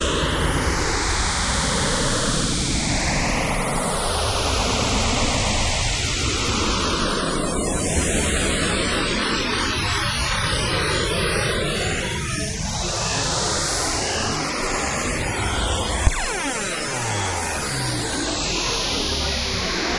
Alien abduction space noises made with either coagula or the other freeware image synth I have.
image, noise, space, synth